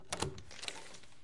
A wet door opening
door-opening, door-open